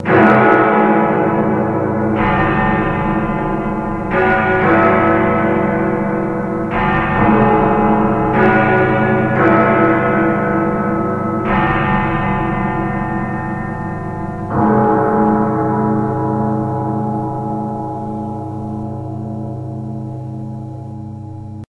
The famous chimes of Big Ben distorted and layered over. Perfect for absurdism. made by me.